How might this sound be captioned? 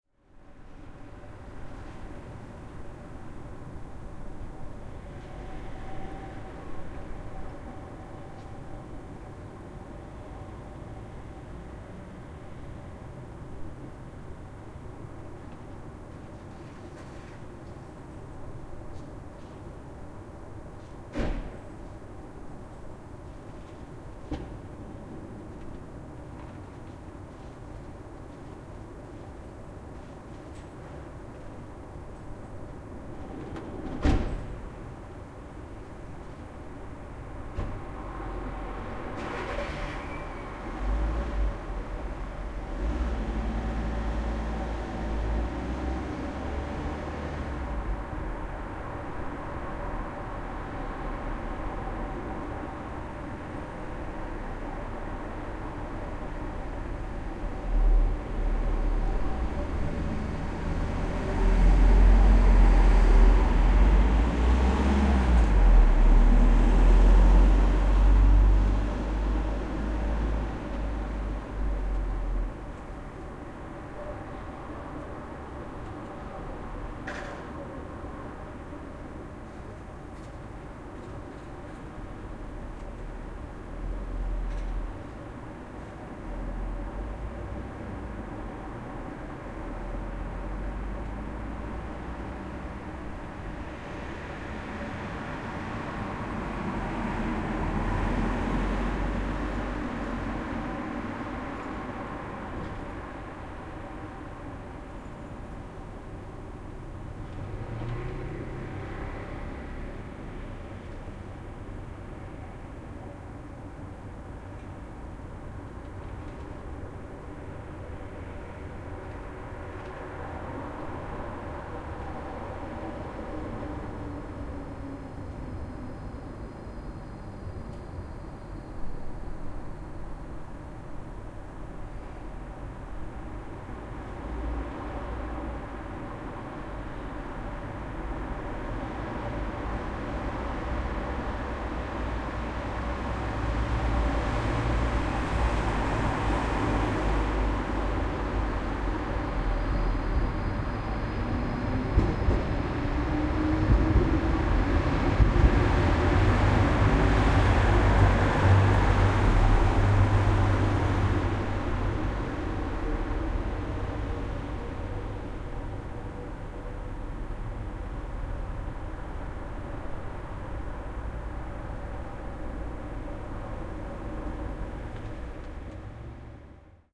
Street at night with cars
Recorded out of an open window on the first floor of a street in a city. Someone closes a car door. There are cars driving through and a tram arrives in the distance.
ambience, car, cars, city, door, field-recording, night, street, tram